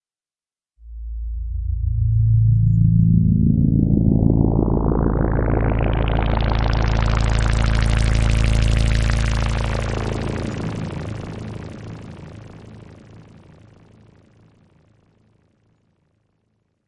cine rotor2
made with vst instruments
ambience, ambient, atmosphere, background, background-sound, cinematic, dark, deep, drama, dramatic, drone, film, hollywood, horror, mood, movie, music, pad, scary, sci-fi, sfx, soundeffect, soundscape, space, spooky, suspense, thiller, thrill, trailer